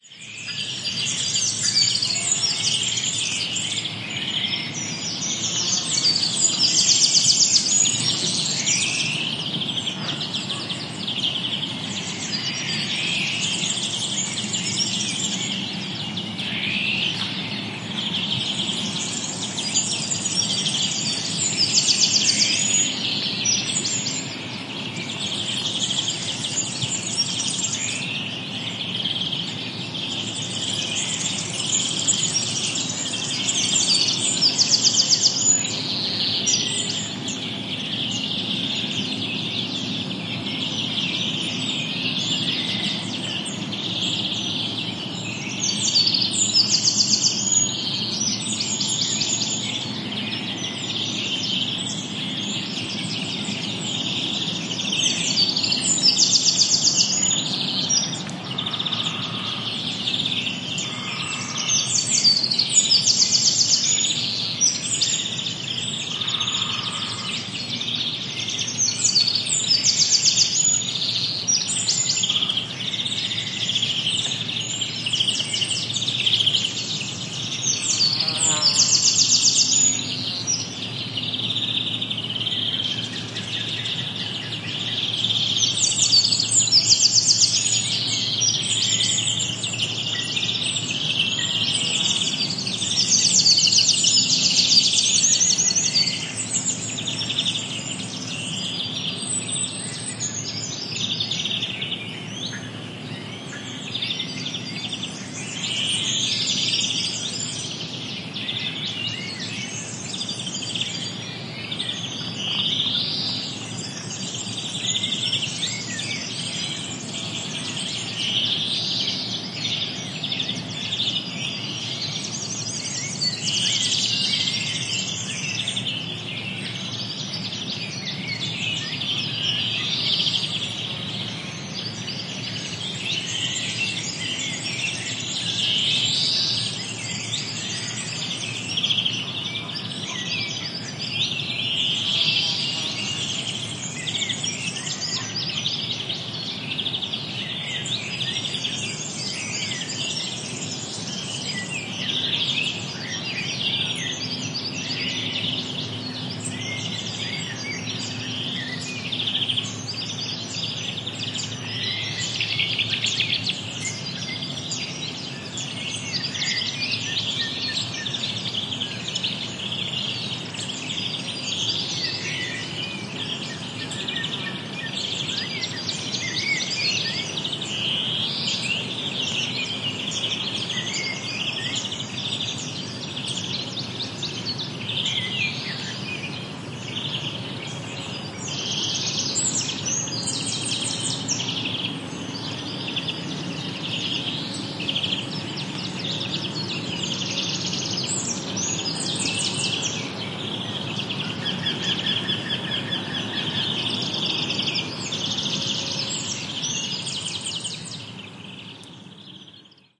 Forest ambiance with lots of birds singing (Warbler, Serin, Blackbird, Cuckoo, Azure-winged Magpie, Woodpecker, among others). Audiotechnica BP4025 into Sound Devices Mixpre-3. Recorded near Hinojos (Huelva Province, S Spain). Traffic on a road at 4 km away is perceptible.
forest, nature, south-spain, spring